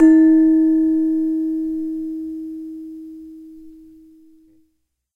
gamelan jawa indonesia demung